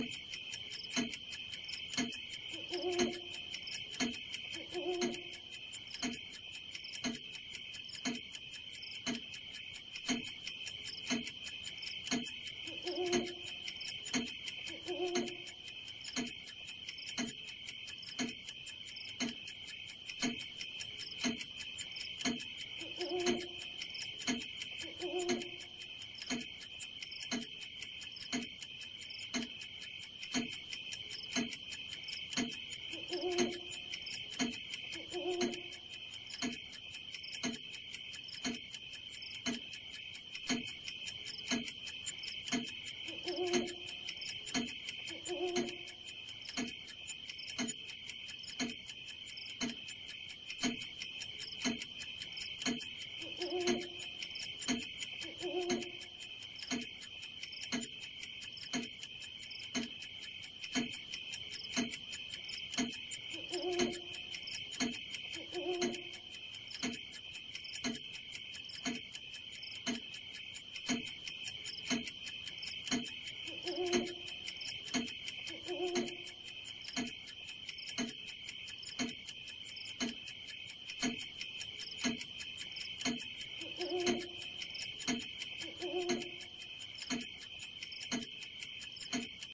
Clock in the night
A simple "tick tock" of the clock with an owl every few seconds.
clock, night